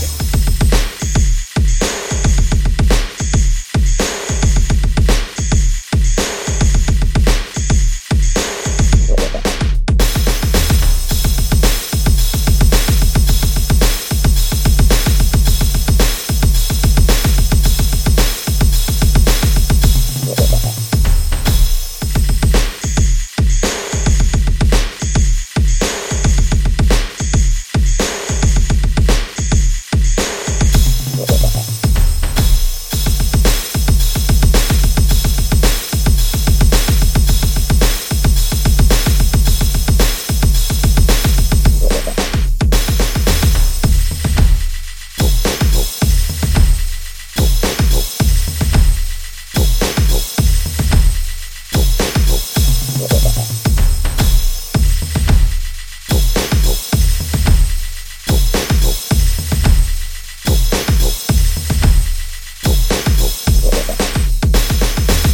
I created these Drum Beat/loops using my Yamaha PSR463 Synthesizer, my ZoomR8 portable Studio, Hydrogen, Electric Drums and Audacity.